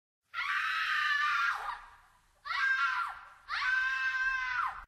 Female Wilhelm Scream
The Wilhelm Scream but the female version (this effect appears in many movies/TV shows)
fear, terror, stock, female, drama, macabre, old, fearful, frightened, wilhelm, scream, horror, scared, haunted